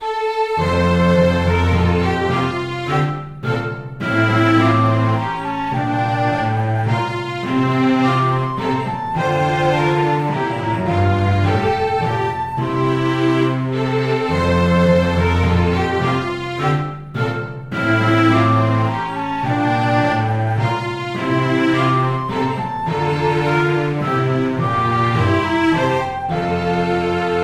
Orchestral jingle, loopable.
You are free to do whatever you want with this snippet...
Although I'm always interested in hearing new projects using this loop!